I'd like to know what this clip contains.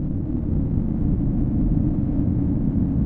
Generic rumble, made in Audacity.
horror, rumble, atmosphere, low, loop, ambience, spooky, cave, bass, dark, ambient, deep, hell, drone, creepy, cavern